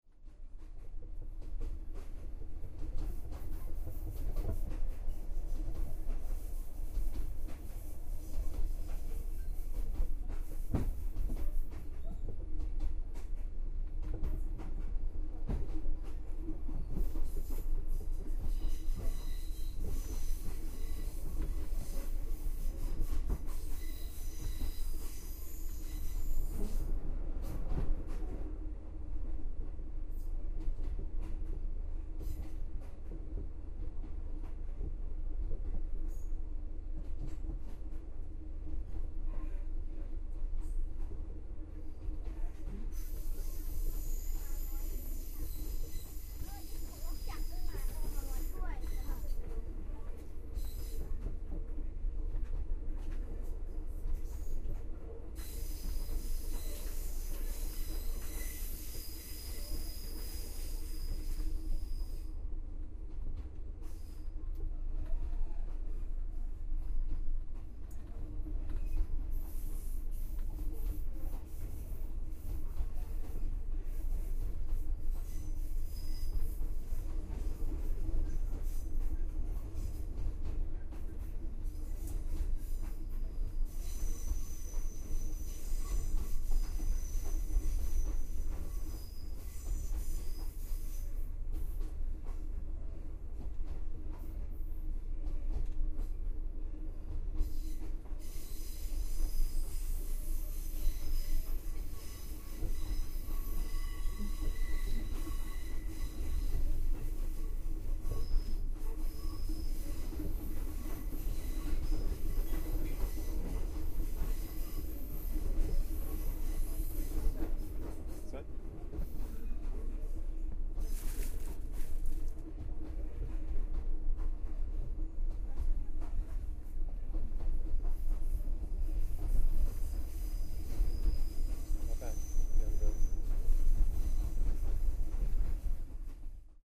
Bangkok Chiang Mai2
On the overnight train from Bangkok to Chiang Mai